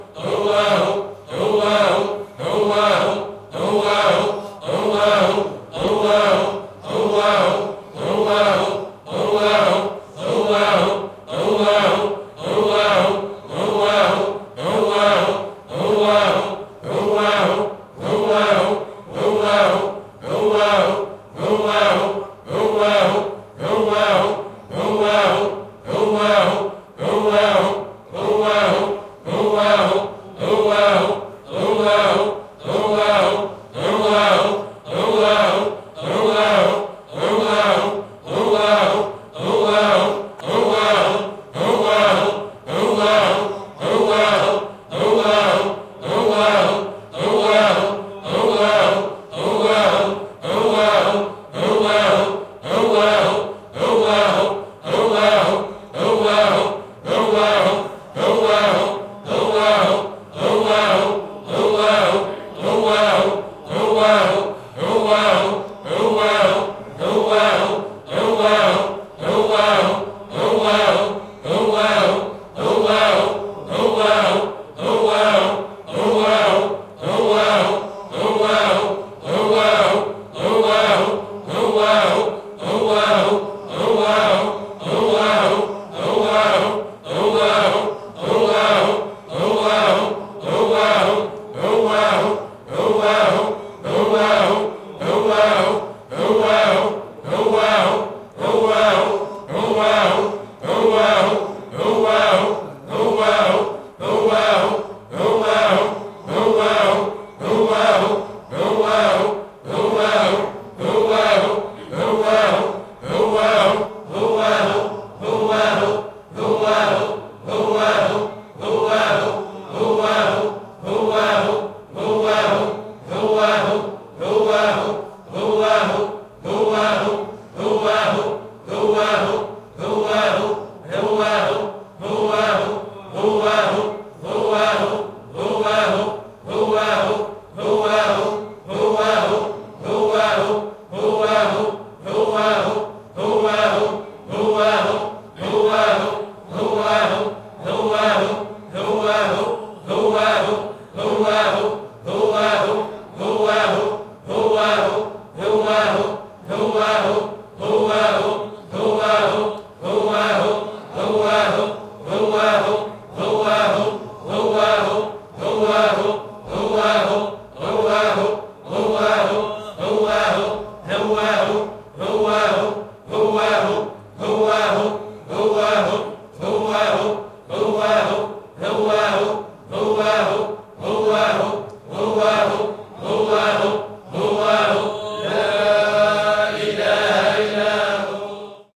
Recoding made in Morocco of a Sufi religious ceremony.